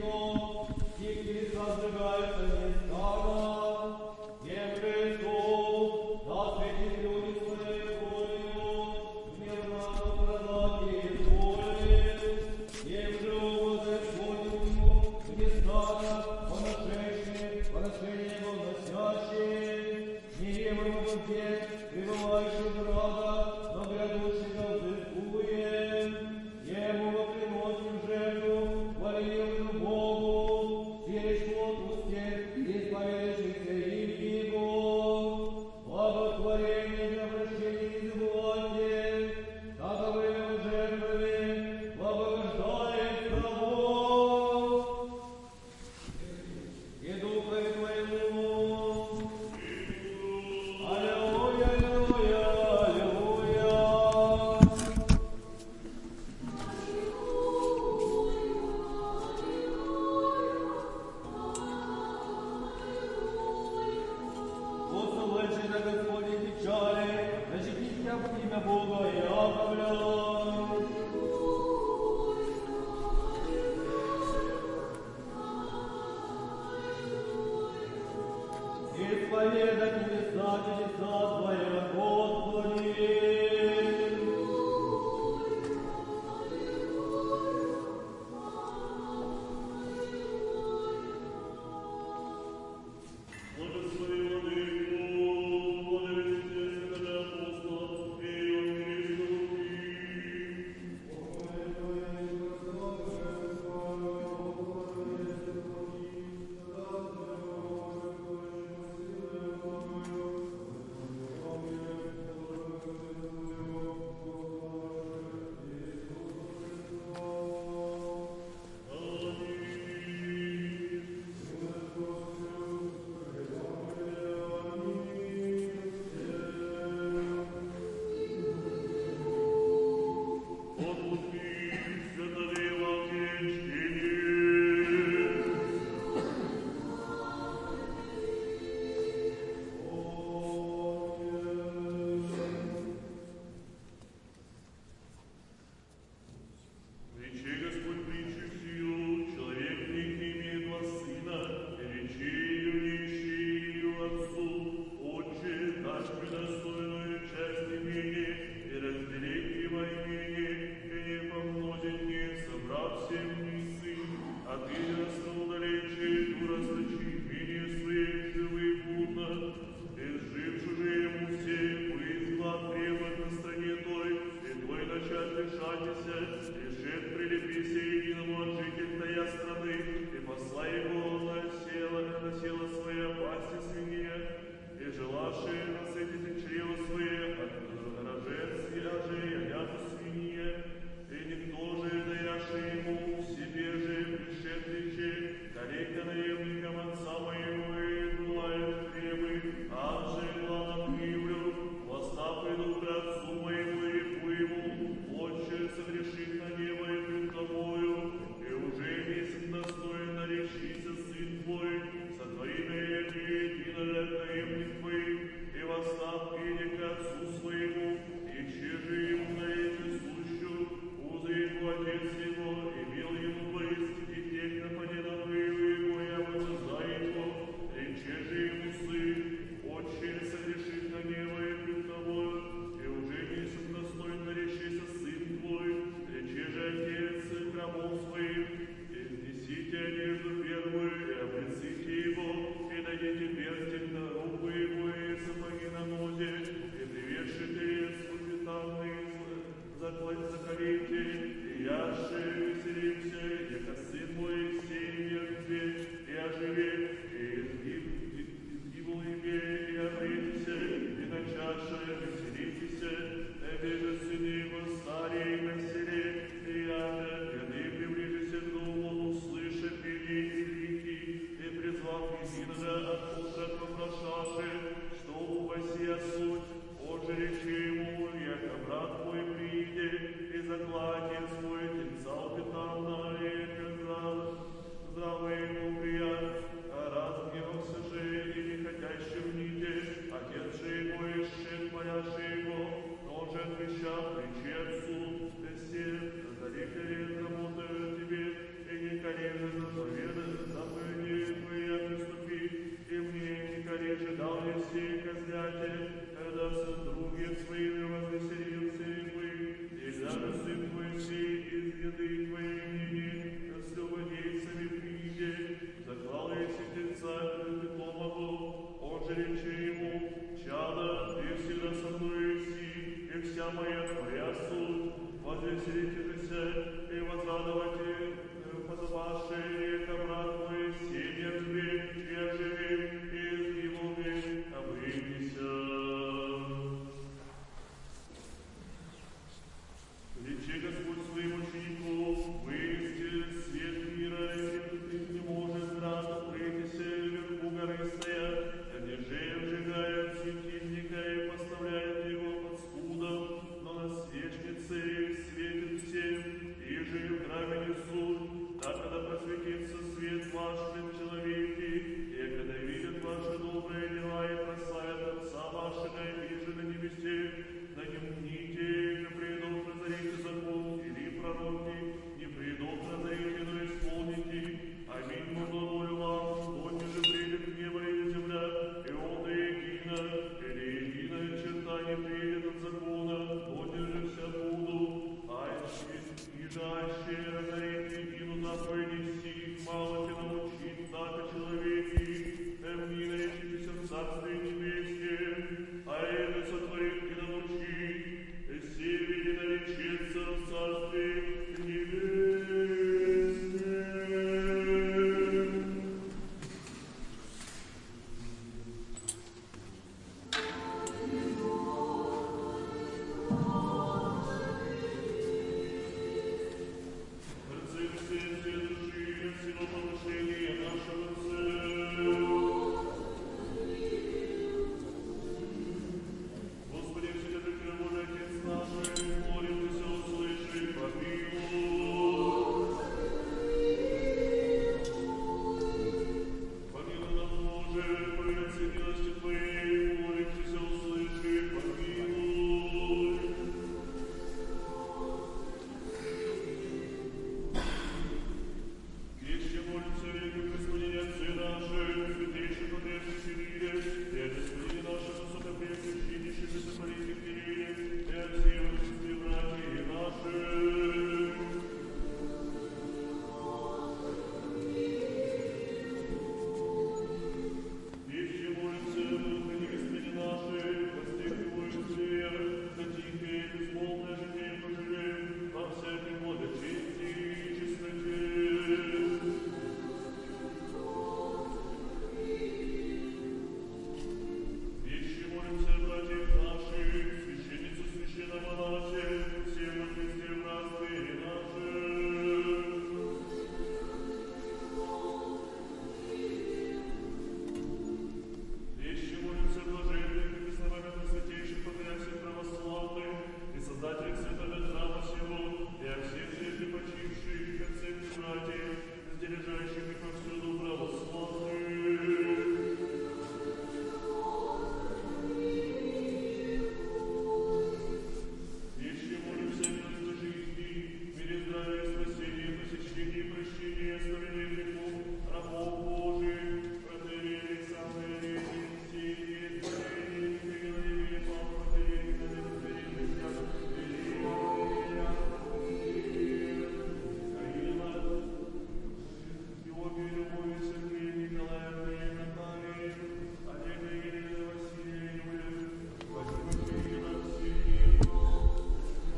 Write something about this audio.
A Sunday in an orthodox church in the south of Russia. Singing, praying, noise
ambience, church, prayer